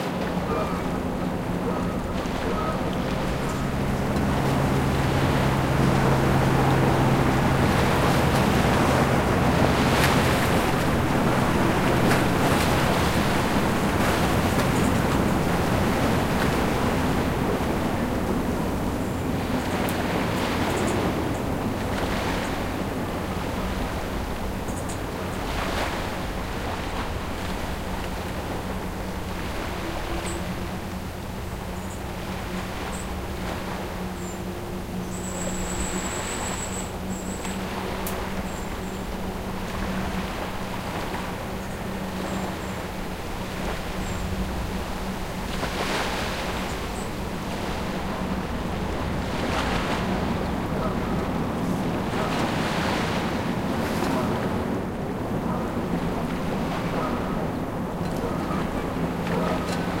Ocean-Designed-loop
Various Ocean shore recordings layered. Puget sound-edmonds beach, redondo beach